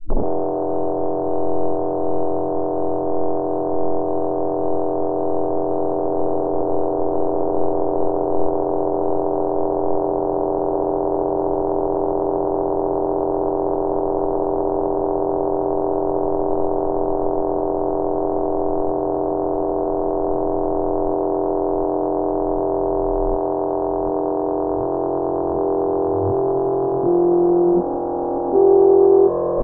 Reverse comb filter sweep on guitar pitched -48st
Freaking around with Surfer EQ. Sweeping through harmonics with a comb filter, then pitched the result -48st. Sounds pretty cool if you're asking me!